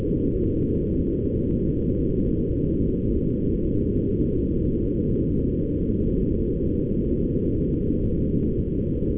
dtvshortwave 16-06-26 3460.3kHz
dronesoundtv, dronesoundtv-shortwave, interference, noise, radio, shortwave